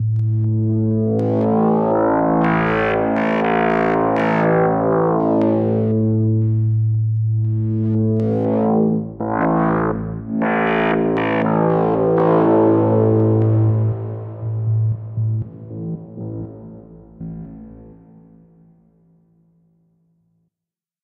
fm buchla aalto drone
Madrona Labs' Aalto FM synthesizer.
synth, fm, poly, noise, space, detune, drone